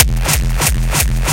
xKicks - A wild pack of Basses
Im sorry I haven’t been uploading lately… I really can’t give a reason as to why i haven’t been uploading any of the teaser kicks lately, nor have i given any download links for the actual xKicks volumes 1 or 2…
Ive actually finished xKicks volumes 1 - 6, each containing at least 250 unique Distorted, Hardstyle, Gabber, Obscure, Noisy, Nasty kicks, and I’m about to finish xKicks 7 real soon here.
Here are various teasers from xKicks 1 - 6
Do you enjoy hearing incredible hard dance kicks? Introducing the latest instalment of the xKicks Series! xKicks Edition 2 brings you 250 new, unique hard dance kicks that will keep you wanting more. Tweak them out with EQs, add effects to them, trim them to your liking, share your tweaked xKicks sounds.
xKicks is back with an all-new package featuring 250 Brand new, Unique Hard Dance kicks. xKicks Edition 3 features kicks suitable for Gabber, Hardstyle, Jumpstyle and any other harsh, raw sound.
Add EQ, Trim them, Add Effects, Change their Pitch.